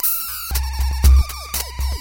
FLoWerS Viral Denial Loop 006
A few very awkward loops made with a VST called Thingumajig. Not sure if it's on kvr or not, I got it from a different site, I forgot what though, if you find it please link to it!
weird, noise, arrythmic, loop, awkward, strange